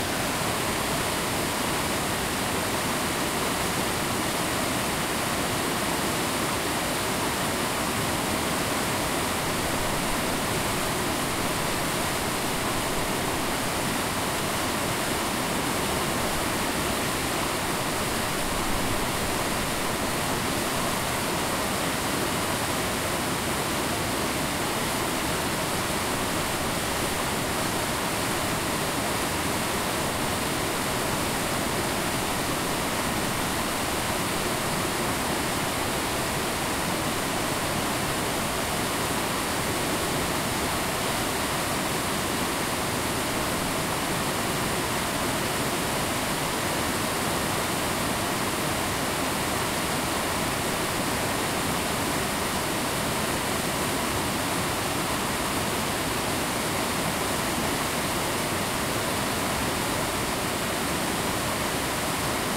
RIVER RAPIDS AMBIENCE 02
A river closely recorded with a Tascam DR-40
river, rapids, water, ambience